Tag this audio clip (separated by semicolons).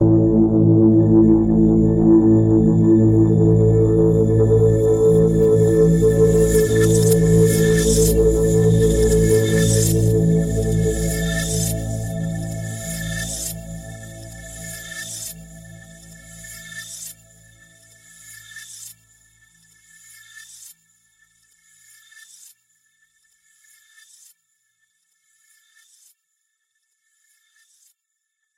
ambient; dark; granular; multi-sample; multisample; synth